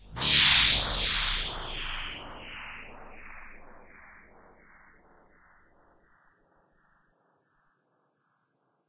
Artificial Simulated Space Sound 19
Artificial Simulated Space Sound
Created with Audacity by processing natural ambient sound recordings
sci-fi, atmosphere, spacecraft, scifi, experimental, spaceship, fx, artificial, effect, ufo, pad, soundscape, ambient, alien, drone, space